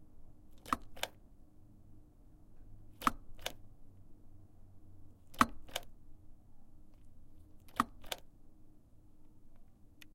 lock, open, close 2
Sound of locking and unlocking door.